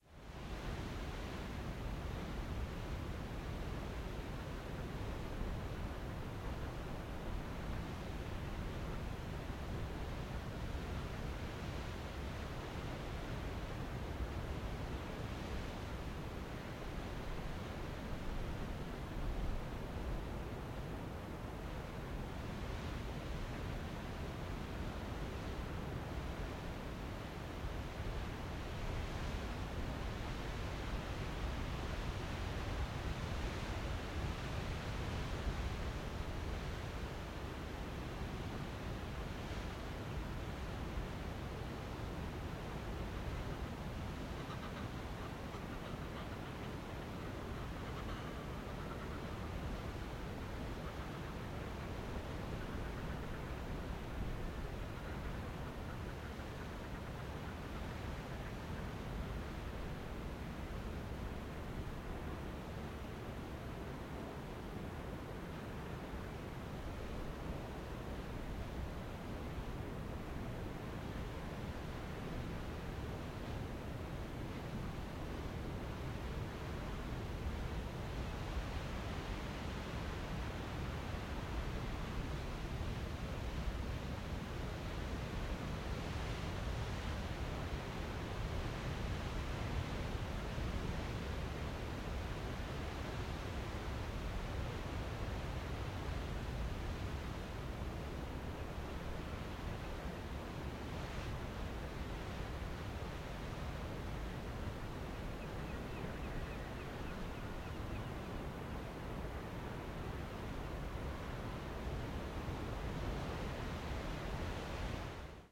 Flamborough ambience
Ambient, Flamborough, field, recording